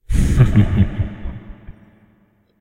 Evil Laugh 1
Sound of a man laughing with Reverb, useful for horror ambiance
terror, creepy, sinister, drama, laugh, spooky, scary, ambiance, fear, suspense, phantom, fearful, evil, haunted, horror